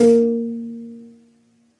34 Cuerda disparada
Rope Sound Fx
Rope, Sound, Fx